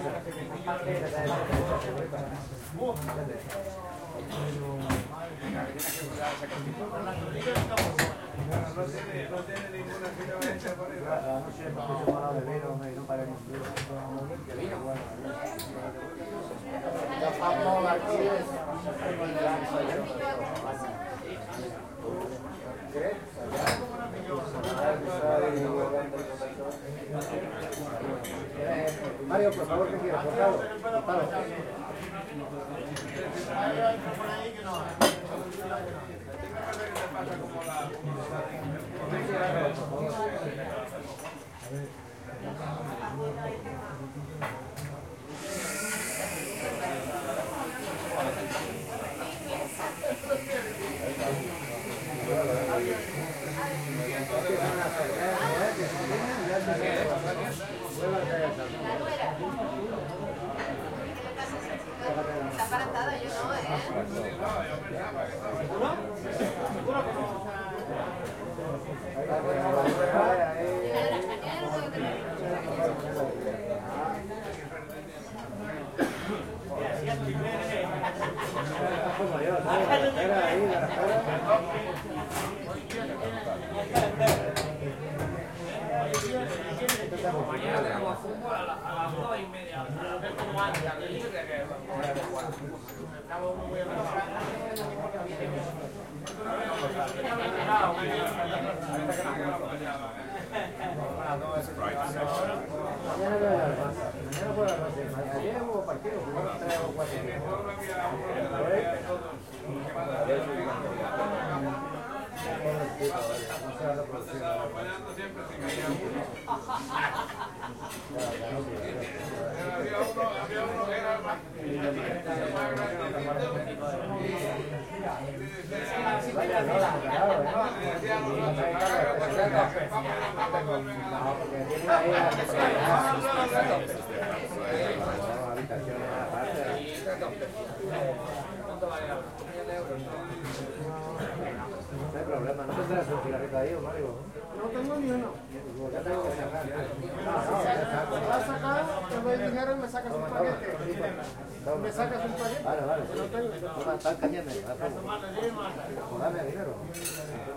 Recording made with Zoom H4 at the canteen in Barcelona, Spain.